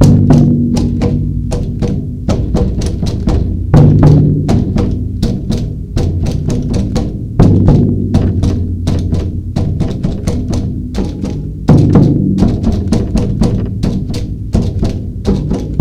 Drums, special design, are tested.Basics.
drum, small, study